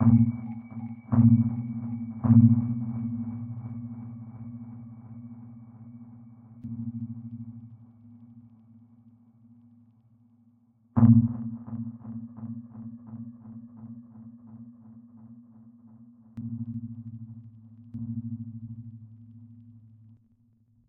dub atmo bass 001
bleeps and bloops made with reaktor and ableton live, many variatons, to be used in motion pictures or deep experimental music.
bleeps, dub, experimental, reaktor, sounddesign